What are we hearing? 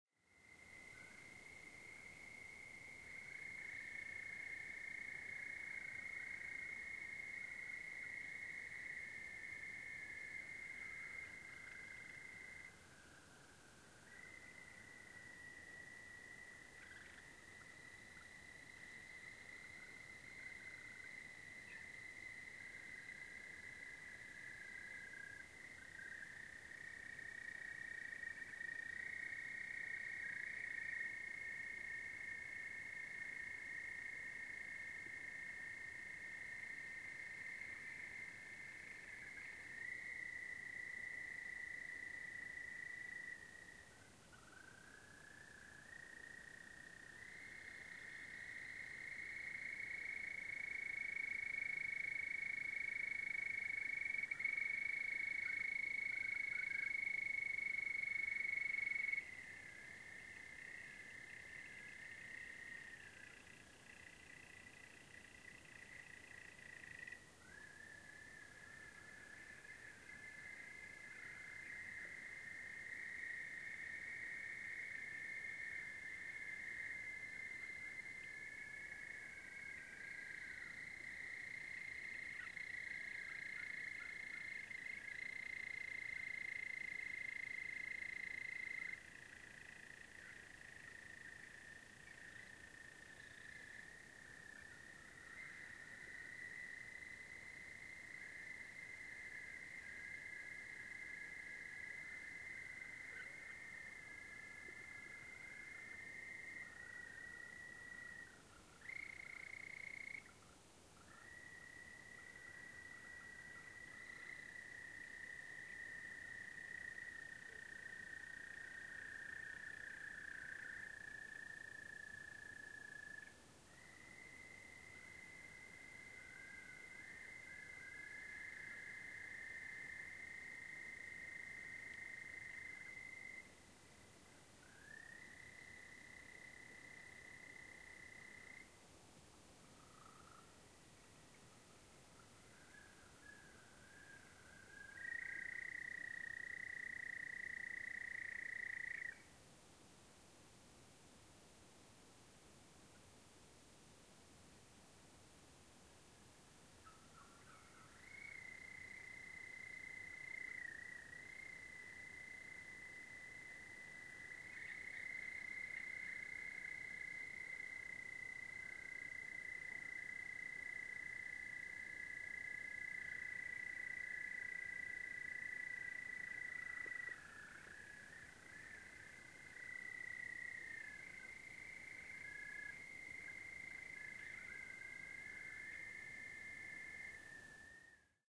A chorus of toads and frogs in a small canyon pothole near Hite, Utah, recorded at night. Very still quiet night with no wind or traffic or airplane noise. Occasional songs from some night bird mixed in. Recorded with a Tascam DR-40